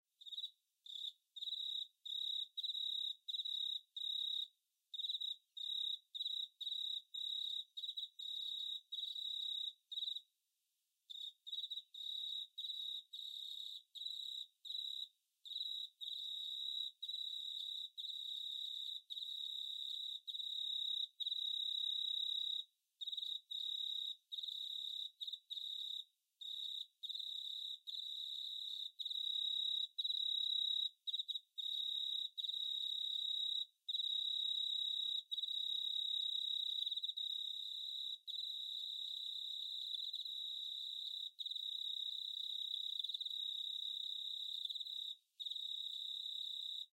Apparently for some species of Cricket you can get a rough estimate of the temperature by counting the chirps in a given space of time. Well it was 20 Celsius when this little beastie was recorded. Recording chain: Rode NT4 (Stereo mic, in Rode Blimp) - Edirol R44 digital recorder.
nature, night-time, field-recording, summer, chirp, jungle, cricket, insect